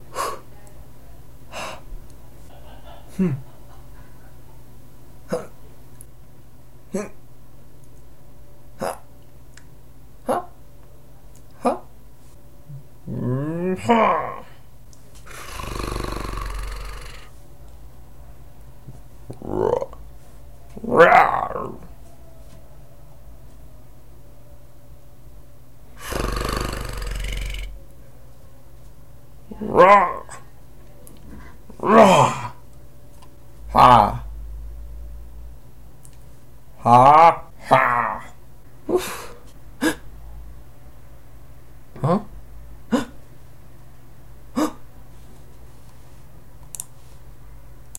Sonidos de quejidos, cansancio, esfuerzo y demas
various sounds made after running or lifting something heavy o feeling releived
breath-out, panth, tired